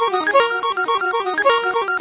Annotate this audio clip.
A kind of loop or something like, recorded from broken Medeli M30 synth, warped in Ableton.
lo-fi, broken